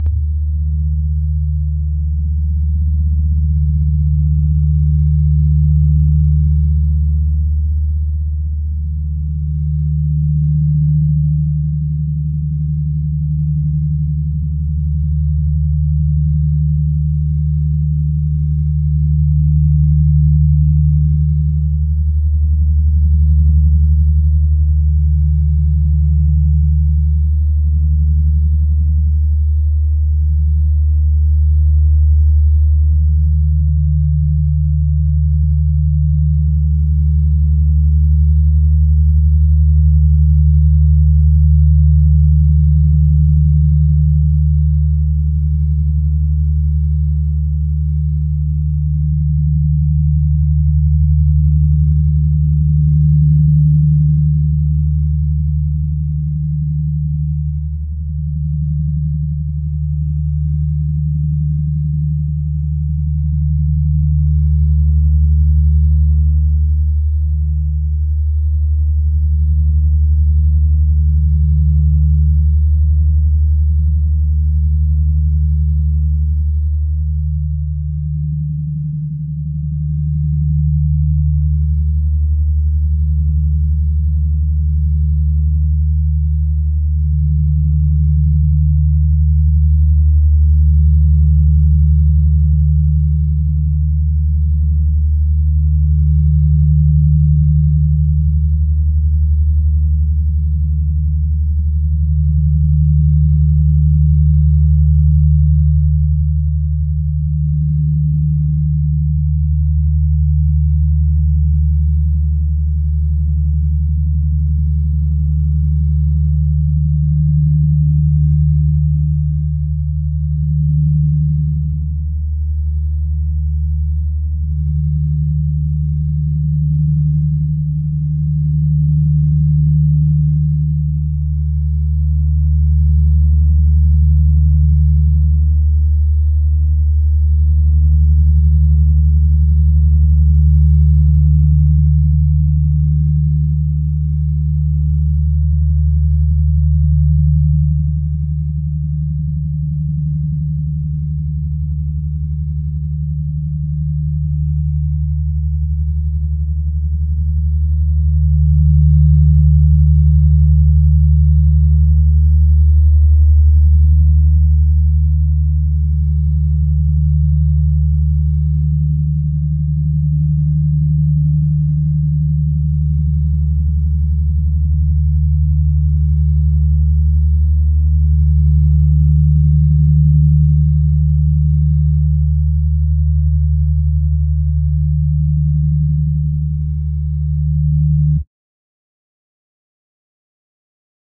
D phase drone
A Phase drone sound in the key of D. Made in ZynAddSubFX, a software synthesizer software made for Linux. This was recorded through Audacity 1.3.5 beta, on Ubuntu Linux 8.04.2 LTS.
linux, synthesizer